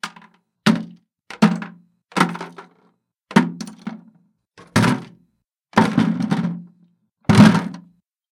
Bucket of Junk Drop In
Dropping different items into a 5-gallon plastic bucket. Recorded in treated room with Shure SM78.
junk, bucket, clattering